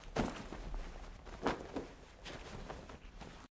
Backpack Foley
Stuff being tossed into a nylon backpack